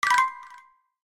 jingle little achievement3
These are free jingles made specifically for video games!